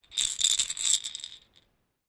Rattle Toy infant stuffet animal 2.L
small stuffed toy rattle movement